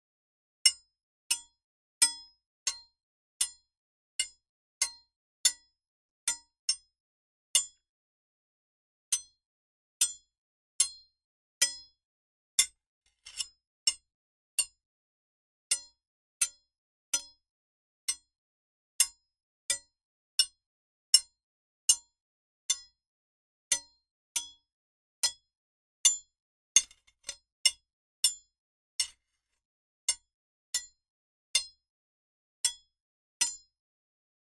Sword Fight
A metal shelf bracket vs. a short piece of 3/8" rebar.
Mostly clangs, and a few metal-on-metal scrapes.
AudioDramaHub, fighting, clanging, swords